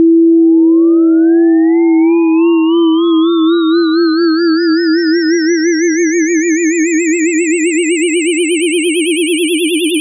Generated with Cool Edit 96. Sounds like a UFO taking off.